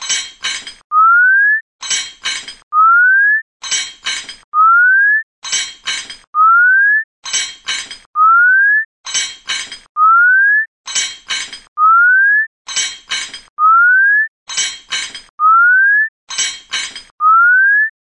Cỗ Máy Hoạt Hình
Audacity:
• Copy 1 from 1.619s to 2.030s
• Copy 2 from 5.480s to 5.879s
• Create→Add New→Stereo track (right)
• Paste copy 1 (at 0.000s)
• Paste Copy 2 (at 0.410s)
• Generate→Silence… (at end: 0.810s)
Duration: 00h 00m 00.100s
• Generate→Chirp... (at 0.910s)
- Waveform: Sine
- Start
Frequency: 1200
Amplitude: 0.7
- End
Frequency: 1800
Amplitude: 0.4
- Interpolation: Linear
- Duration 00h 00m 00.700s
• Effect→Fade In (from 0.910s to 0.982s)
• Effect→Fade Out (from 1.540s to 1.610s)
• Generate→Silence… (at end:1.610s)
Duration: 00h 00m 00.200s
• Effect→Repeat
Number of repeats to add: 9